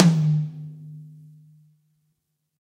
High Tom Of God Wet 019
realistic, drumset, pack, kit, set, drum